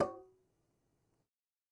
Metal Timbale closed 008
closed, conga, god, home, real, record, trash